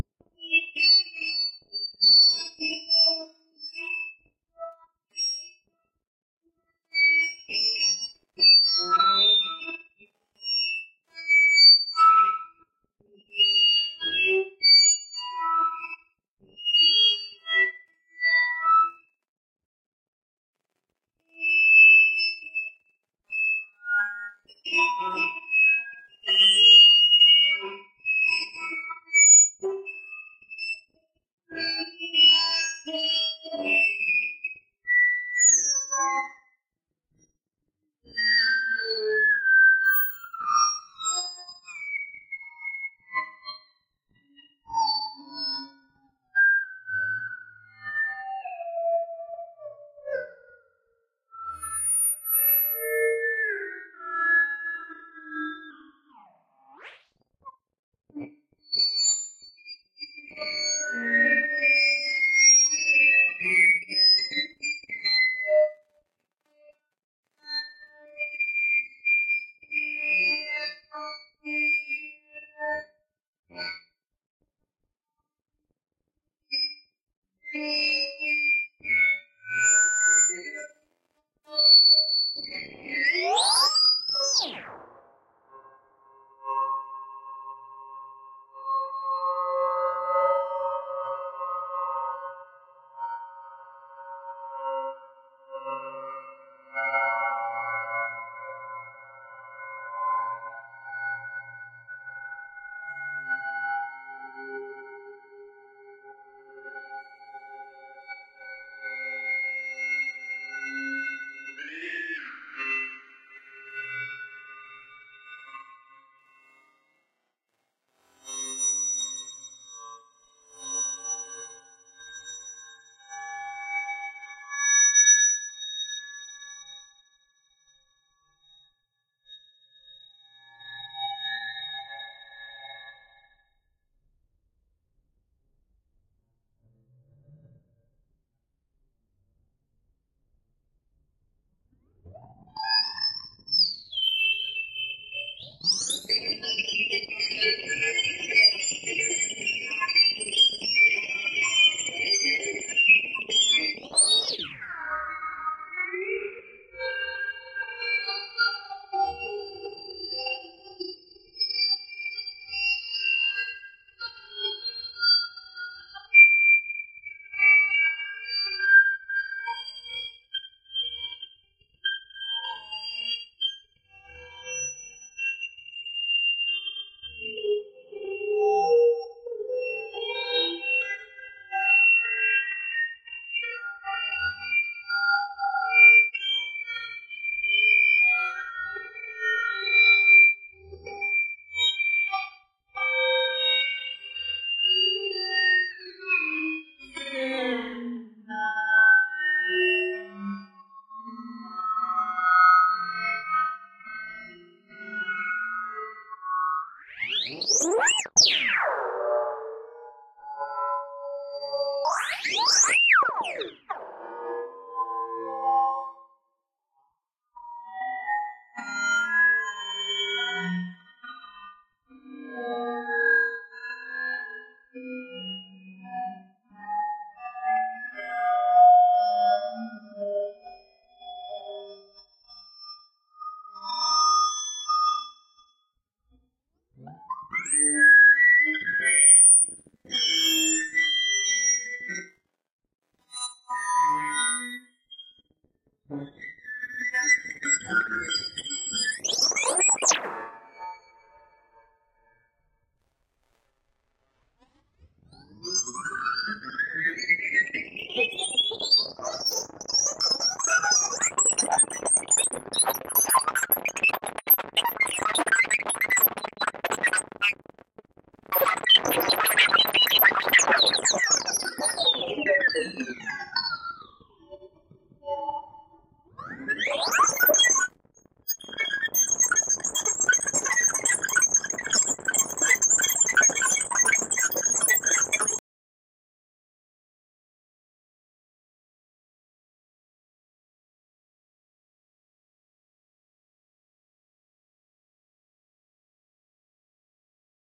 Samurai Jugular Raw
A samurai at your jugular! This is the original file that the other Samurai Jugular sound effects were created from.